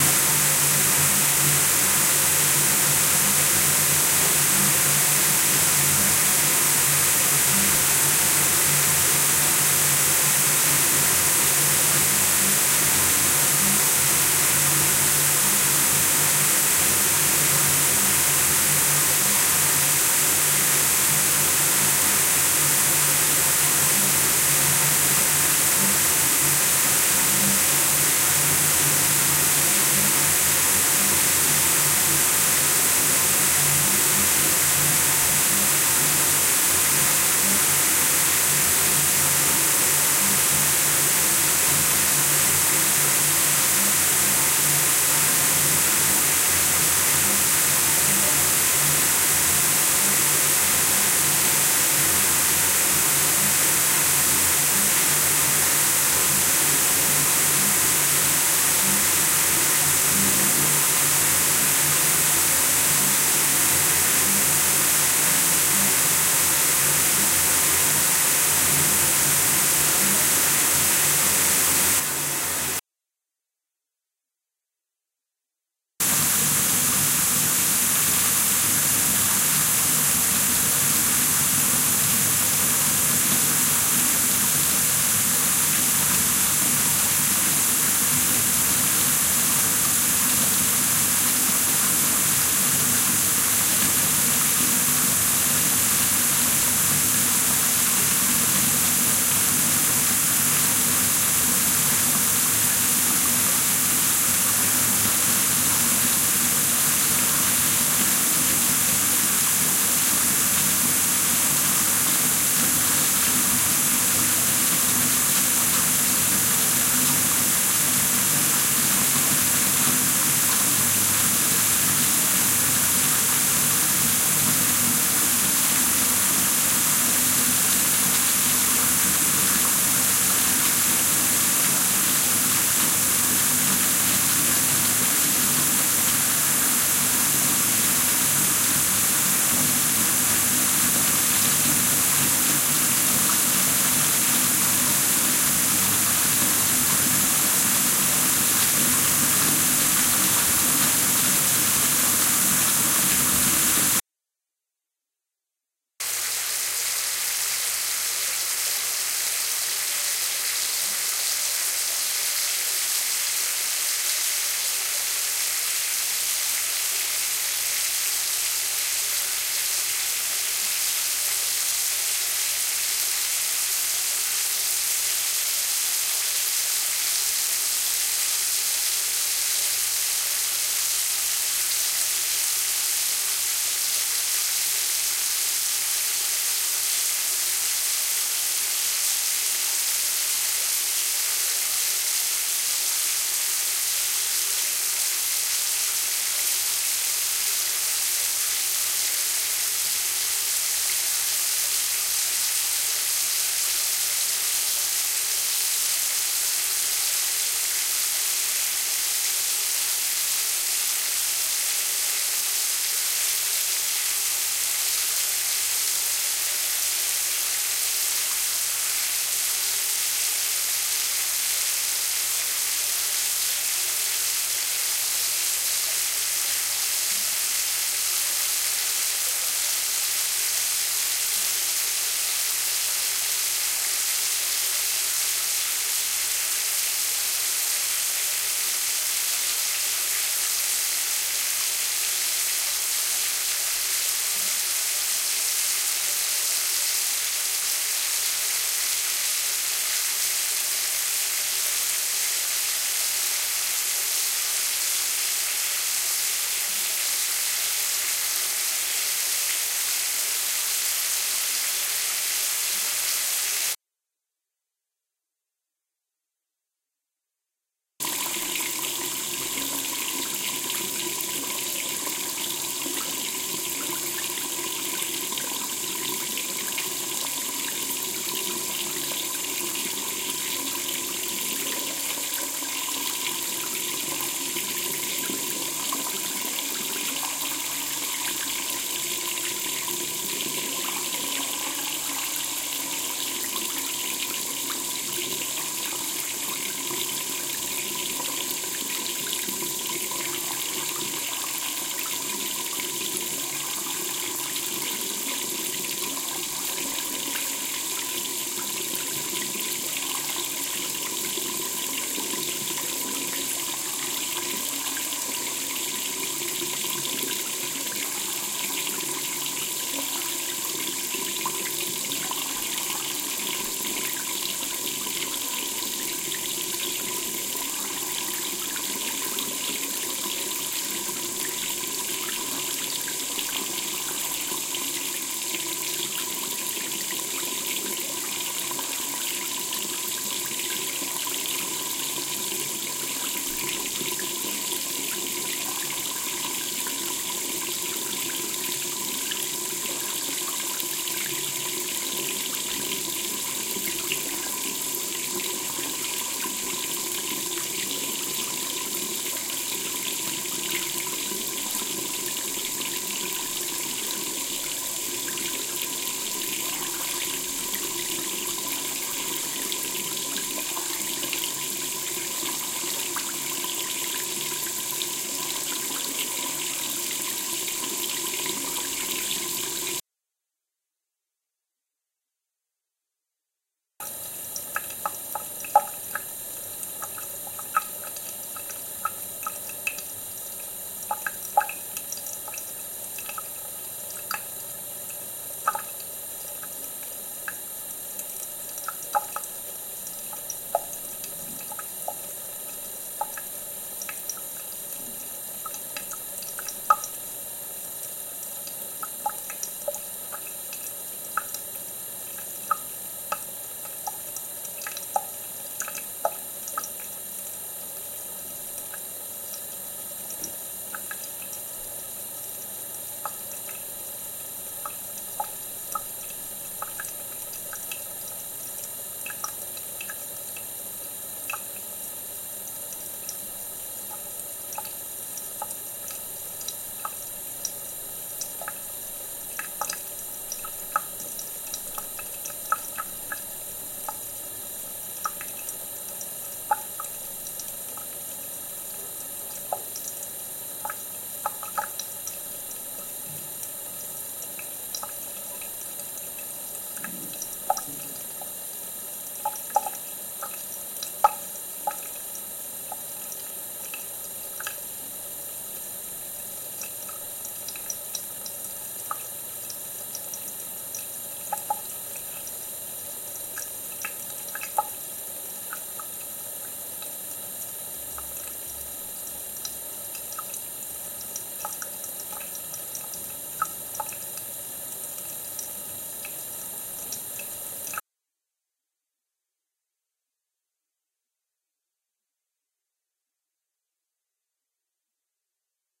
Water running down the bath tub (easy)
Water running down the bath tub. Easy intensity...